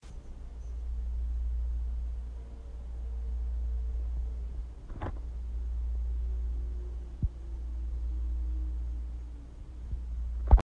White Noise
Radio, effect, sample, White